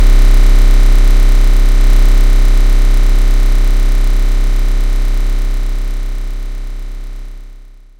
13 C1 Sine, hand made
Some C1 32.7032Hz sine drawed in audacity with mouse hand free, with no correction of the irregularities. Looping, an envelope drawed manually as well, like for the original graphical "Pixel Art Obscur" principles, (except some slight eq filtering).